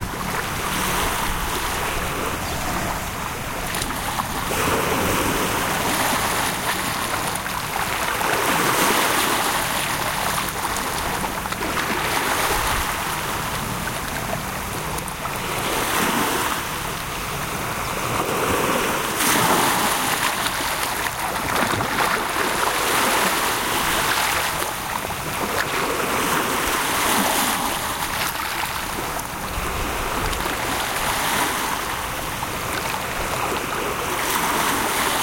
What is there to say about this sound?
Ocean Philippines, calm waves, Cebub
little, waves, philippines, ocean, sea, calm, cebu